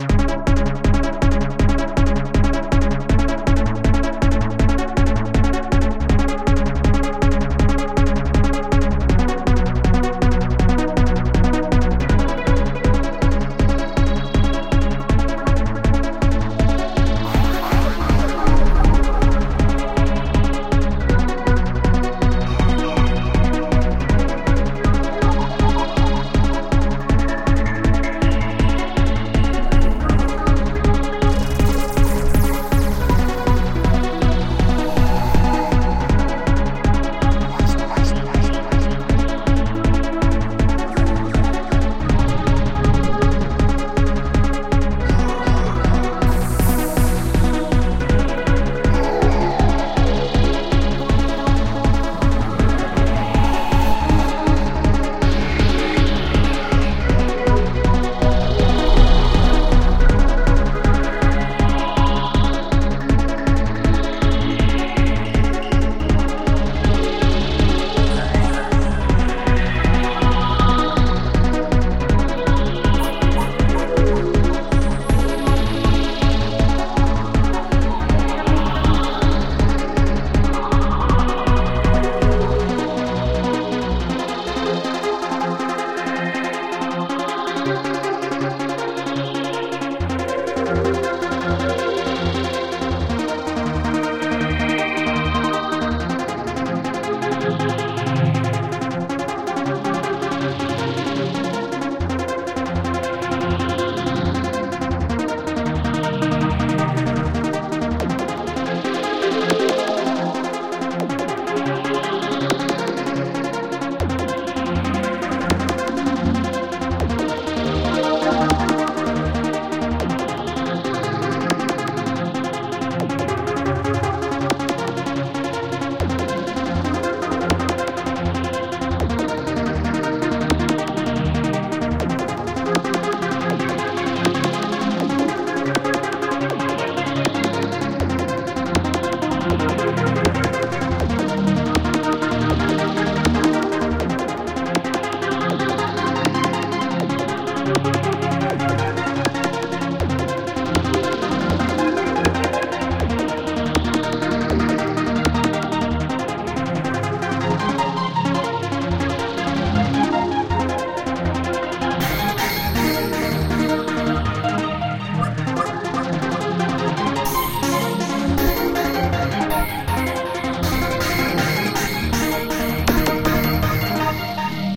Game loop for underwater music for level 3 'Ocean Turtle Coral Reef' (Sân Hô Rùa Biển - ปะการังเต่า) of game Đôn Hổ. Create use Garageband and World Music and Remix Tools Jam Pack. 2018.06.04 14:55